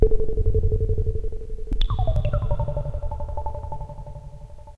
space blip4
A cute sort of spaced out alien computer bleep. Home made Nord Modular patch through a SPX90 reverb.
alien, bleep, blip, bloop, digital, echo, reverb, space